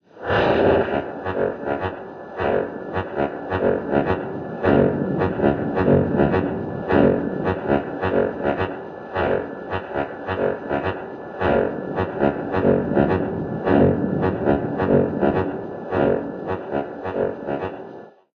treadmill cut
Funny little phaseshifting loop generated from electronic artifacts
phaseshift, experimental, artefacts, repeating